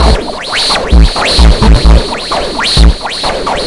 FLoWerS 130bpm Oddity Loop 010
electro, experimental, loop, resonance